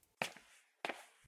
walking-footstep
Two footsteps walking indoors
footstep, walk